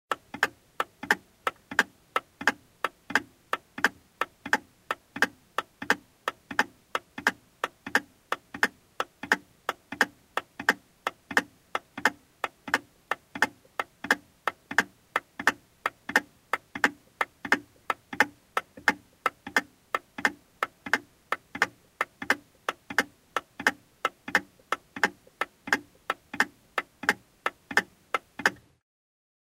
Content warning
Ticking mechanical clock with a pendulum.
wall-clock, clockwork, tick, ticking, clock, pendulum, tic-tac